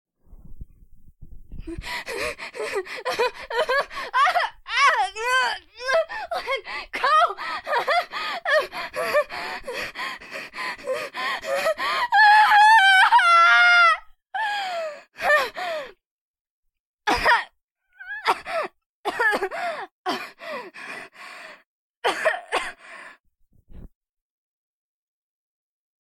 Women being grabbed
taken, hurt, talk, girl, pain, agony, squall, scream, gasp, request, young, american, woman, english, female, speak, human, grabbed, captured, voice, vocal, cry